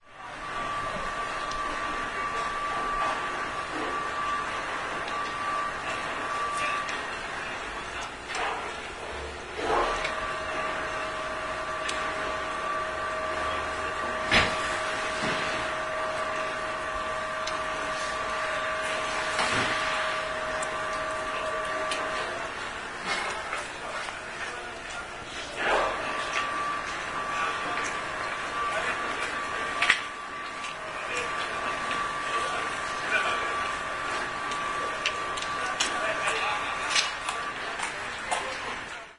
08.09.09: about 20.00; Tuesday in Sobieszów (one of the Jelenia Góra district, Lower Silesia/Poland); Ignacego Domeyki street near of the Post Office; the place where linen is pressed; the sound of the mangle machine